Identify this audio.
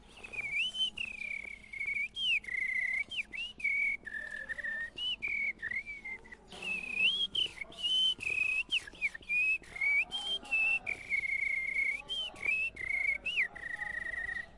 Imitação de pássaros / Fake birds
Micael imitando um pássaro com alguns pássaros de fundo, gravado com um Zoom H4 no pátio do Centro de Artes da UFPel.
Micael imitating a birds and other birds in the background, recorded with a Zoom H4 in the courtyard of Centro de Artes of UFPel.
fake, ufpel, pelotas, birds, bird, cinema, imitation